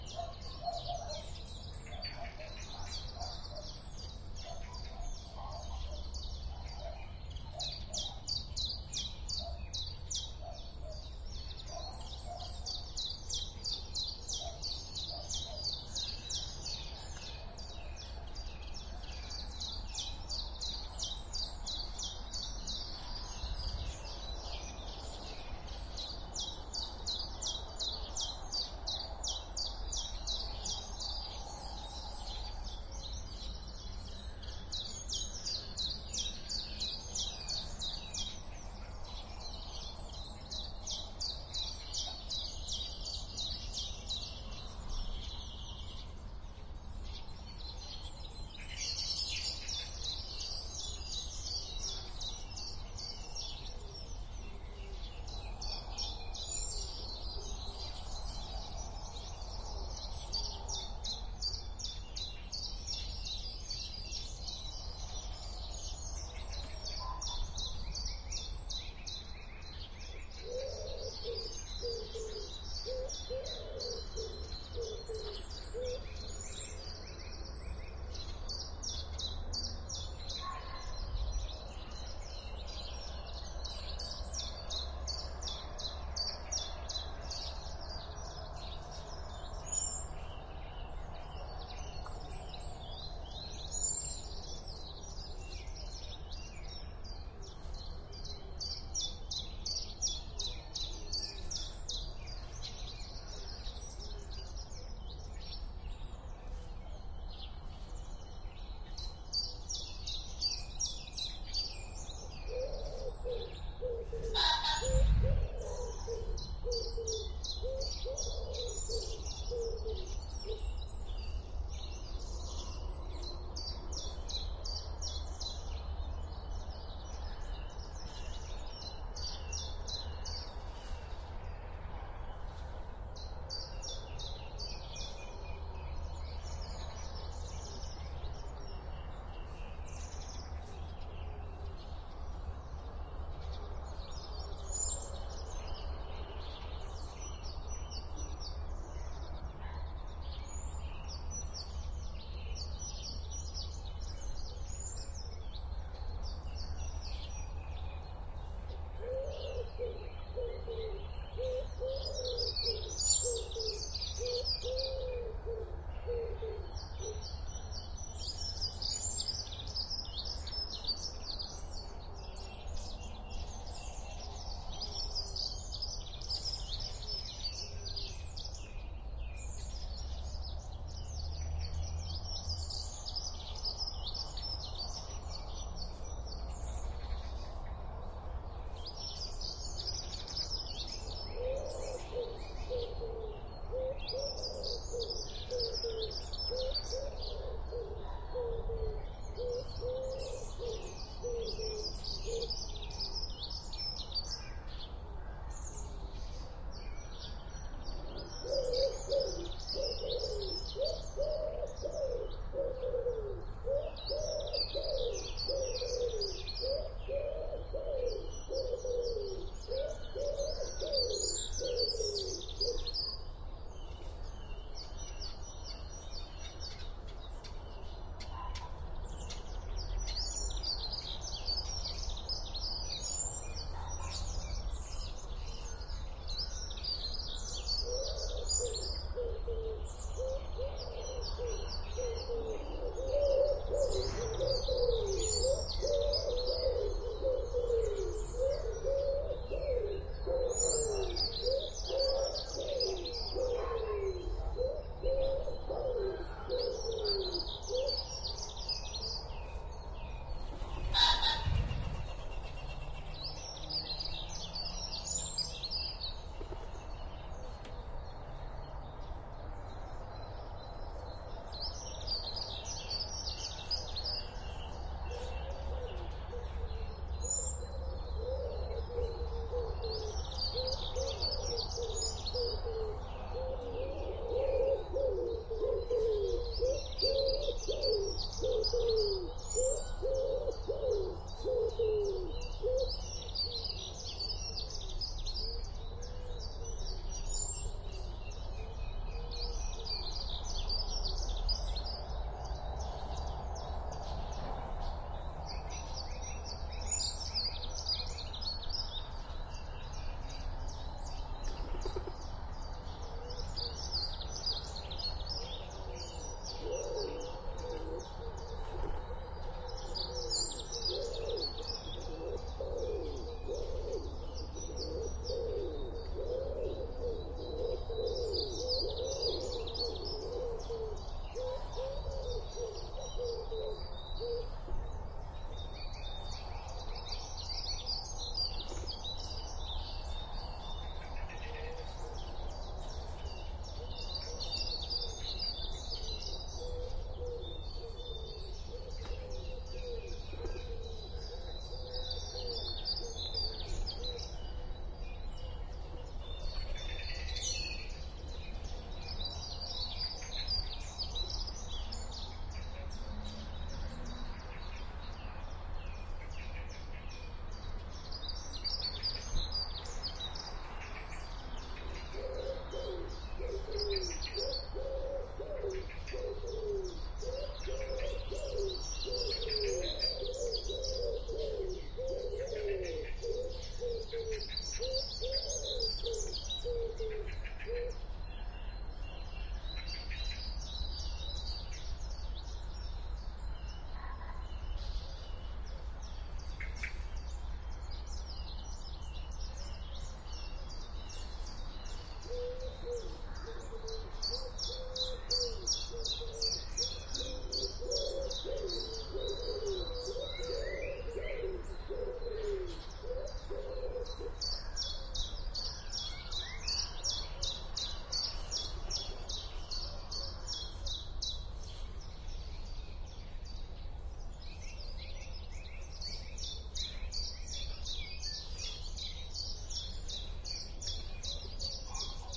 spring morning
This recording was done in April, early in the morning in Jutland / Denmark. There are soo many different birds on this recording, that it would be fun, if you could name them all!
Rode NT1-A microphones, FP24 preamp into R-09HR.